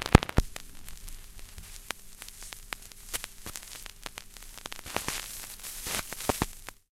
Vinyl - 45RPM - Start 2
Stylus placed on 45RPM record.